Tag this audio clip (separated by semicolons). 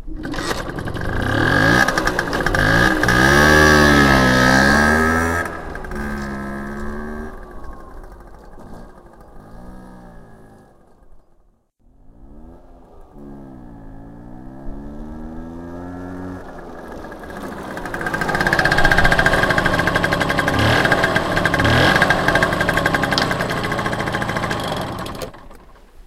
scooter; vespa; driving; motor; start; engine